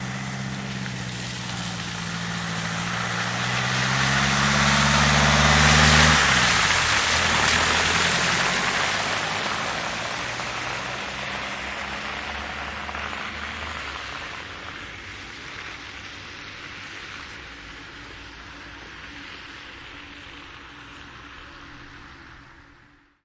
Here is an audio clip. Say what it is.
Car Passing by Background
cars, general-noise, city, field-recording, background, atmospheric, soundscape, atmosphere, noise, effect, white-noise, sound, ambience, background-sound, ambient, ambiance